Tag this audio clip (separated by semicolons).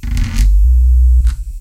recording; transient; motor; big; bass; wobble; sub